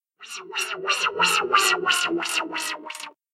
Low E string on acoustic guitar scrape slide with pick. Increased pitch, wah-wah effect at 31.2%, light tremolo,fade and noise reduction used. Recorded with Conexant Smart Audio using AT2020 mic, processed on Audacity.
spacey, wah, Wah-wah-wah, sliding, dizzy, slide, string, scrape, funky, spin, guitar, scraping, spinning